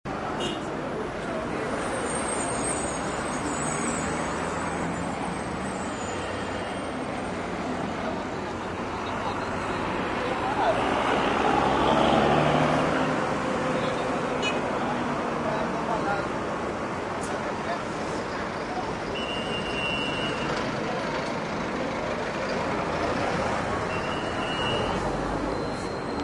The bus stop near the Central American University in Managua. Ambience sound at 5:45PM on a weekday. Buses, a police whistle, traffic and people yelling. Recorded with a hand recorder.